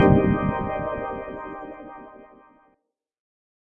5 ca chord
a deep hit with release could be used in liquid drum and bass or house
synth, chords, hits, sounds, samples, one